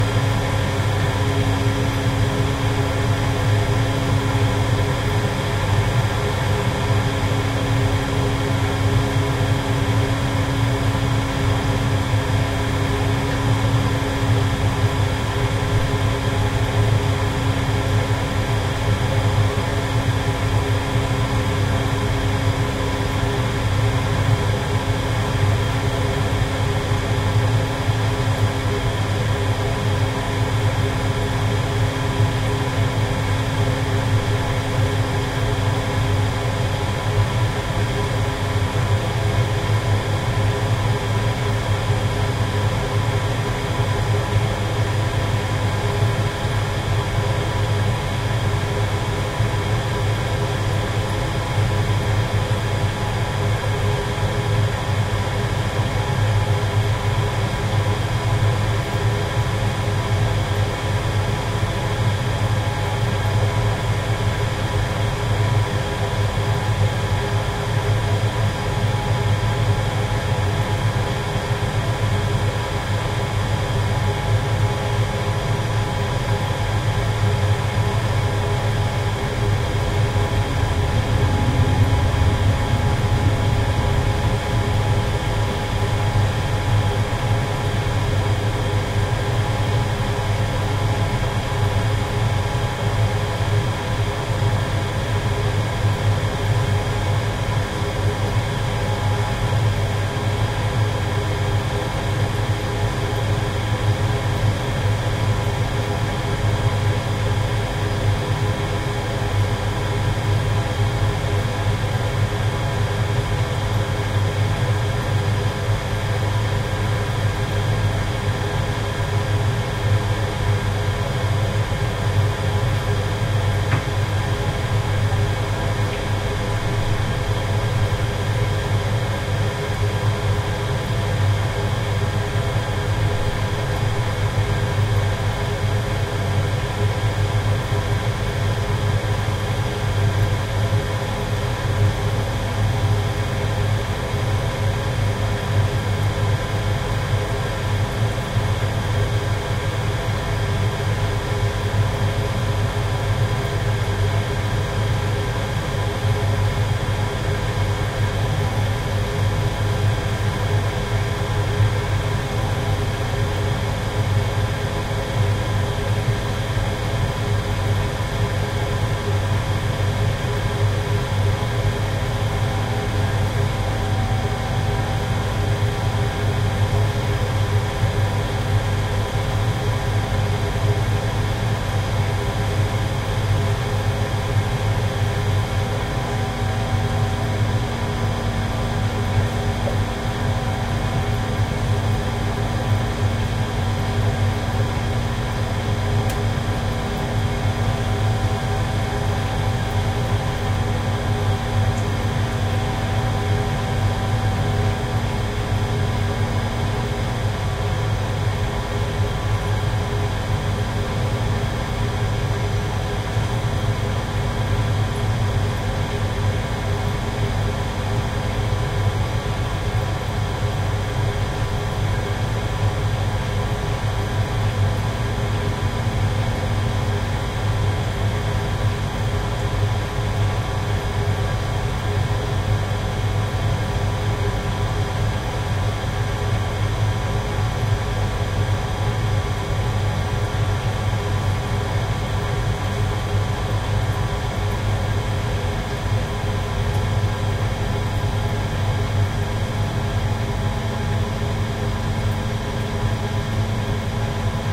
Machine,engine running - Boiler firing up
My home gas boiler firing up and heating the house. Sounds rather like an engine or a spaceship
Recorded on Zoom H6 with inbuilt XY mic
boiler, domestic, firing, gas, loud, machine, noise, running, up